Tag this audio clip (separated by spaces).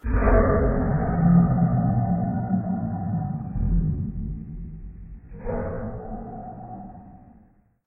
animal beast creature dinosaur dragon evil growl monster roar zombie